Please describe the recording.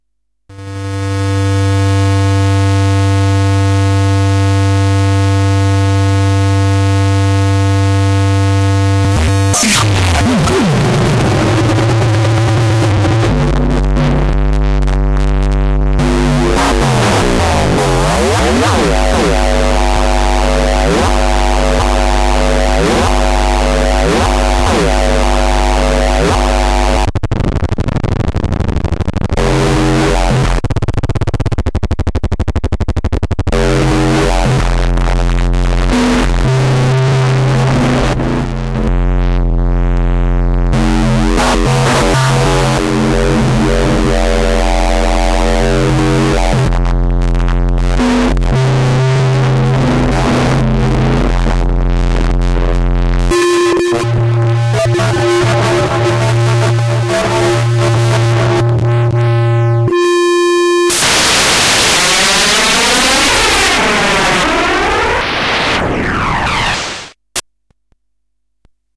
Composition made using SoundEdit 16 on Mac. Mainly done using the
SoundEdit 16 generated tones put through many different filters.
filtered, industrial, noisy, electronic, glitch, composition